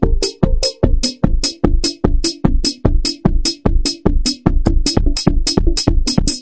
NeoHardTek Break2
Minimal drumloop break maybe like Hardtek Style !!